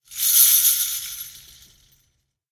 JinglingBells Wreath 02
a wreath of small metal bells jingling
jingle, jingling, bells